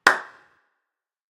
Clap with small reverb